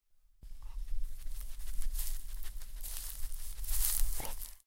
sniffing dog
Dog sniffing around and walking in the grass.
Dog, sniffing, steps, walking